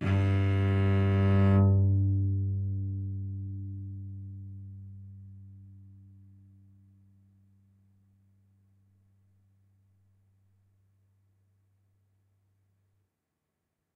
Bowed note on cello